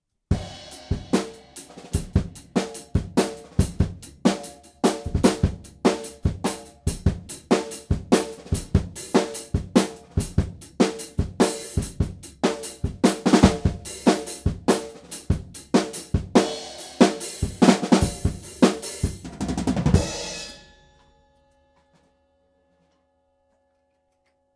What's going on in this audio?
Drums Funk Groove 6
Funky, groovy drum beat I recorded at home.
Recorded with Presonus Firebox & Samson C01.
hiphop, hip-hop, drum, soul, funk, loop, drums, hip, rnb, hop, groove, groovy, rhythm, funky